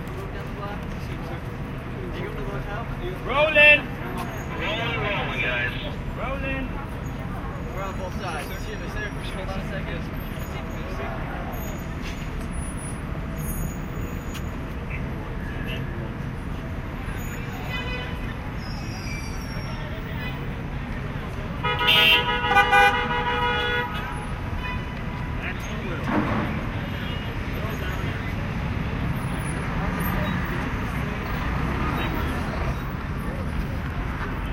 New York City Filmset
Recorded 2006 in New York City on a film set of the movie "i am legend".
New-York, City, Traffic, Street, Film